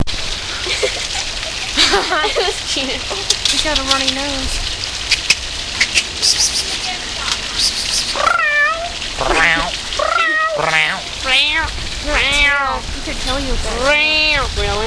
People making cat noises in front of a panther or cougar recorded at Busch Wildlife Sanctuary with Olympus DS-40.
nature, ambient, field-recording, growl, cougar